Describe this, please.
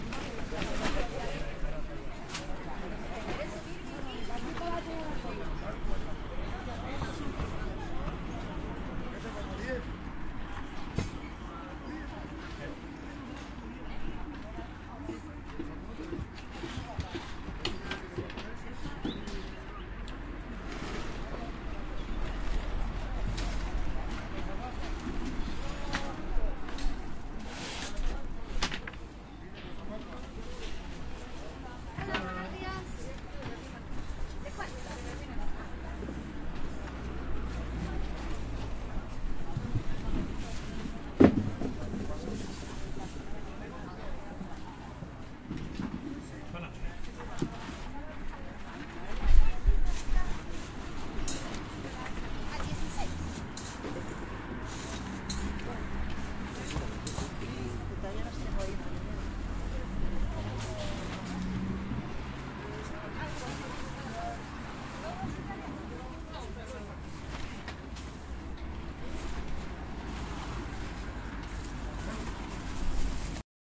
Gente Trabajando Coches al Fondo
Grabacion de un mercado de pueblo durante el desmontaje de los puestos.